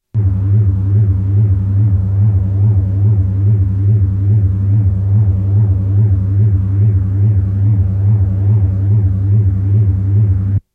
Bass wave obtained (I didn't know how so recorded it immediately) with a microphone plugged on a delay pedal for guitar.